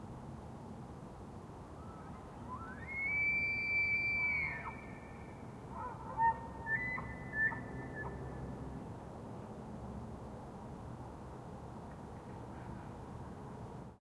Recording of an elk in Banff, Alberta, during mating season. The cry is very distinctive and somewhat frightening. Recorded on an H2N zoom recorder, M/S raw setting.